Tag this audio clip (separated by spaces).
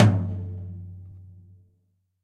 drums acoustic stereo